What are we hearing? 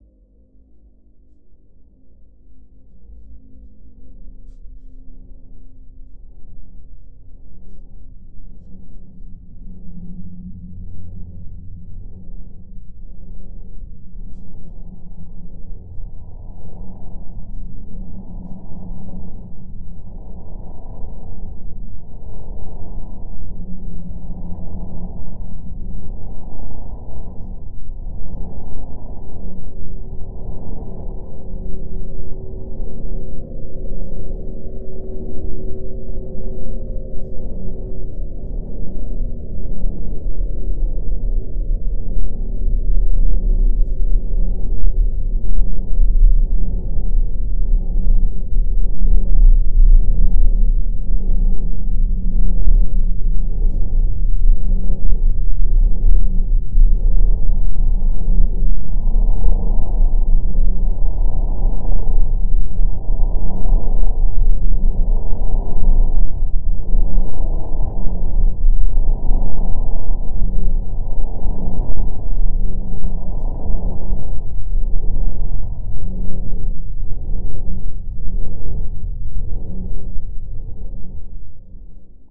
Ambient level / location sound
Level sound, ambient.